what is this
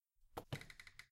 A one-shot footstep on a creaking wooden floor.